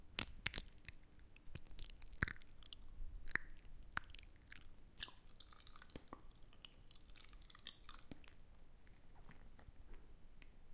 Sticky Mouth Sounds
I make strange sounds with my mouth. Sounds sticky, icky, slimy and wet.
This recording was made with a Zoom H2.
h2, icky, liquid, mouth, slime, sticky, wet, zoom